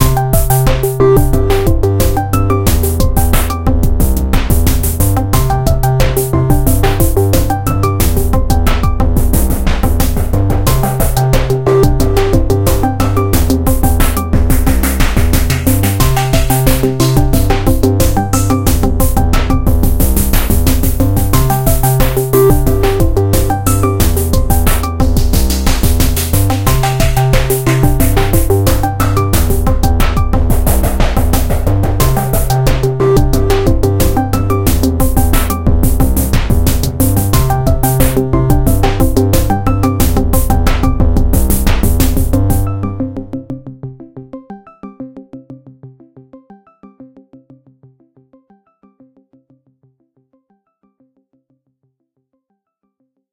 90 bpm ATTACK LOOP 3 total mixdown mastered 16 bit
This is a melodic drumloop created with the Waldorf Attack VSTi within Cubase SX.
I used the Analog kit 1 preset to create this loop, but I modified some
of the sounds. It has a melodic element in it. The key is C majeur. Tempo is 90 BPM.
Length is 16 measures and I added an additional 4 measures for the
delay tails. Mastering was done within Wavelab using TC and Elemental
Audio plugins.